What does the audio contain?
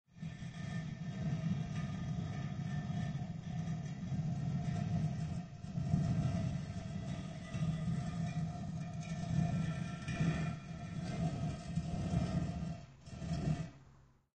Heavy stone door opens 2
Push aside the heavy stone slab to discover the treasure room beyond. Or slide aside the lid on a heavy sarcophagus. Whatever it is, a heavy stone door slowly slides open.